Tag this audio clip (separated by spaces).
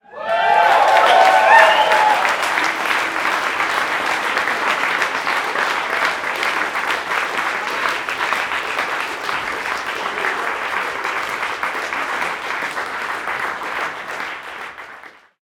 cheer clapping audience clap applause group people happy crowd applaud applauding